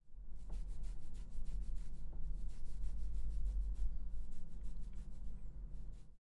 Scratch the head